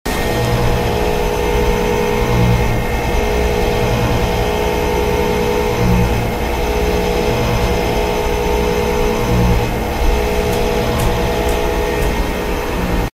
Stripped Gears in VAV Box
The beautiful sounds of office life.
This is the sound of "stripped gears in a VAV box," as described by building management. Something in our supply room ceiling related to the HVAC system, apparently. Serves a dual-purpose as a psychological experiment on the human tolerances for audio distress.
Before identification, it was variously imagined to be: a giant pencil sharpener, close to our location but in another dimension; or the ghosts of a bunch of street mobsters still having their last shootout.